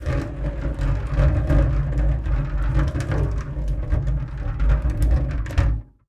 This is one of those Office Water Cooler bottles rolling its got nice low end, I've even forced downsampled it to play at 8000k or something and it sounds like some spaceship ambience or something.